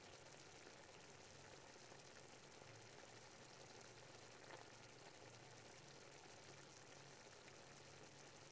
Water Boil(loop)
Boiling water on stove. LOOPABLE